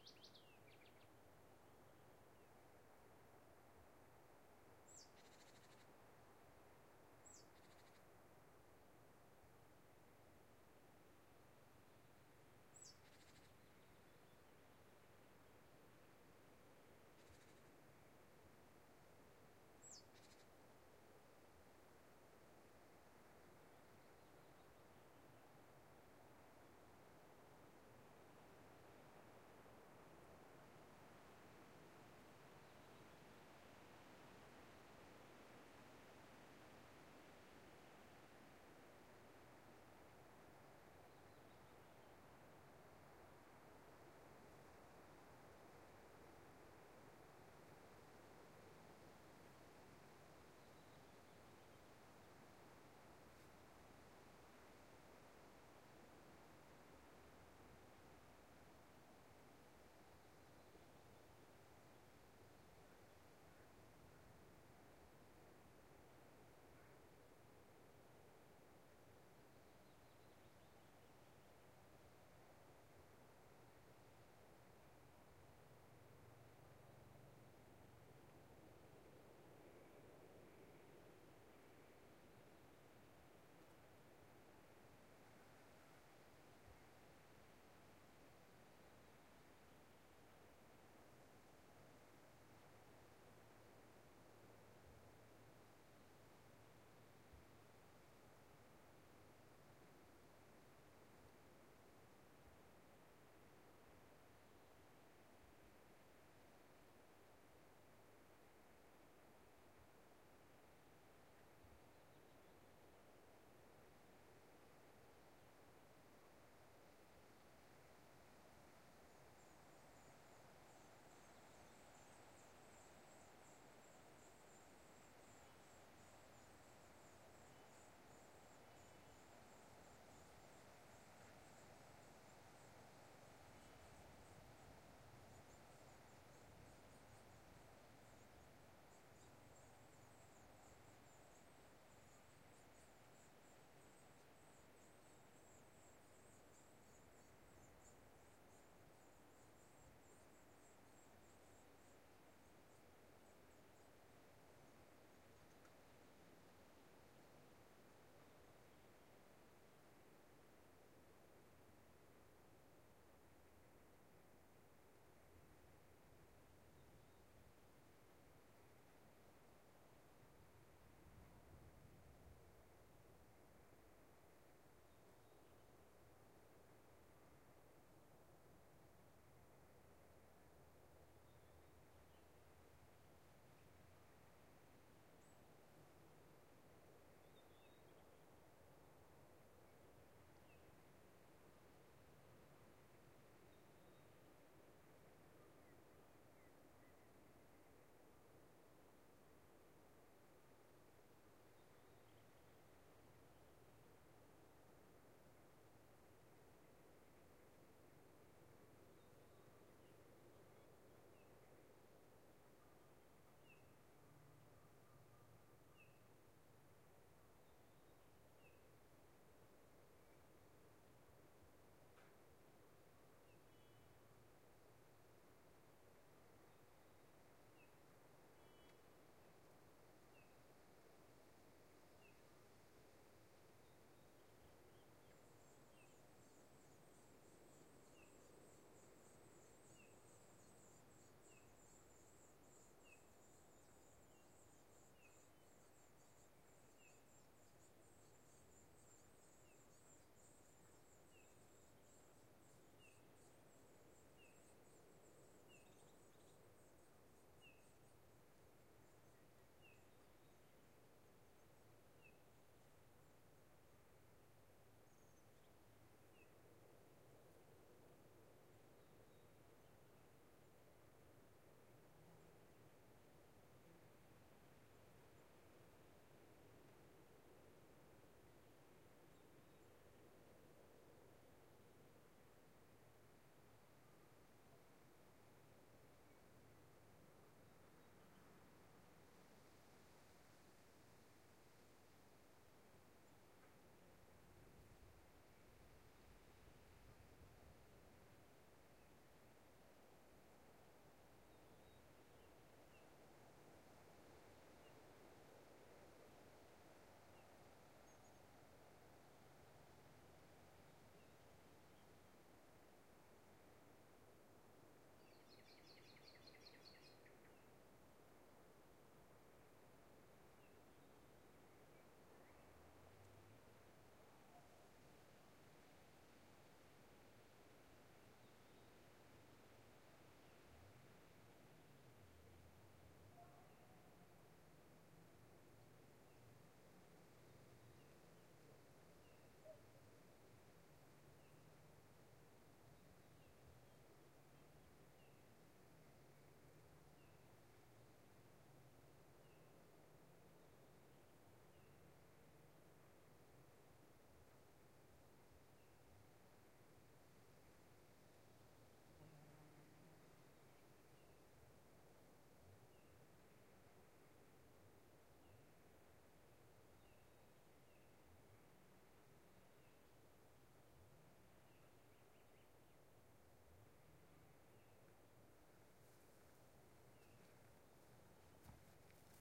Nord Odal Nyhus 04 juni 2011 open forest view of valley birds insects wind through large pines
Pine forest in Nord Odal small place north of Oslo, Norway. The spot has a view over the valley, distant traffic can be heard in the background.